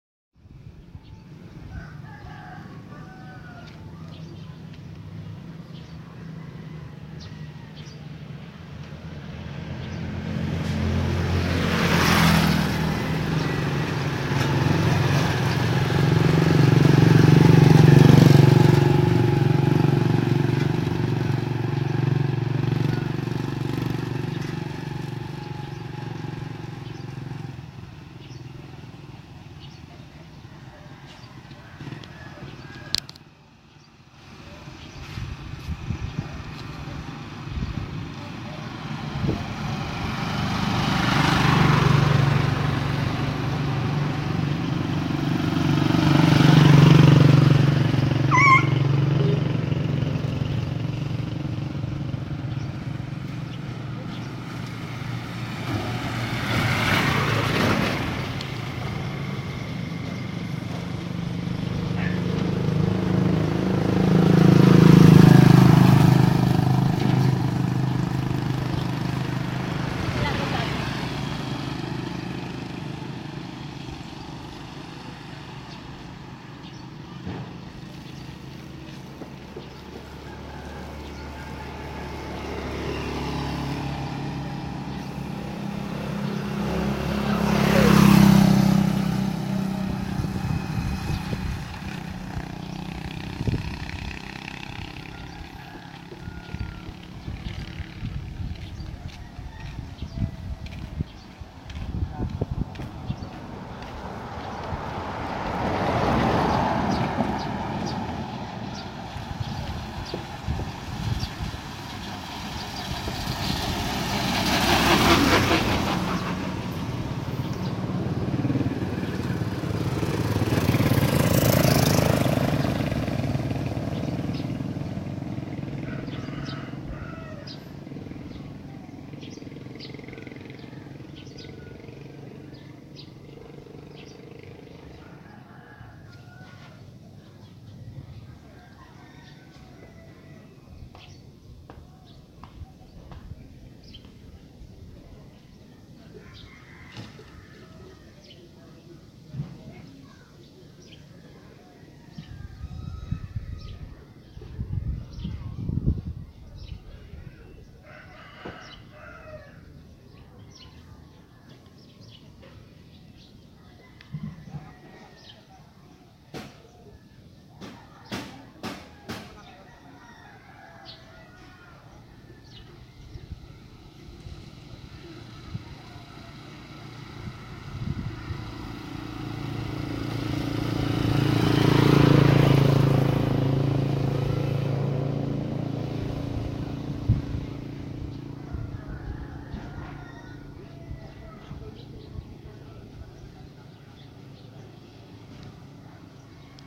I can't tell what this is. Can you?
A typical recording of what usually happens during a quiet and hot afternoon at a neighborhood far away from the heart of the city of Puerto Princesa, Palawan.
neighborhood, suburban, vehicles, ambience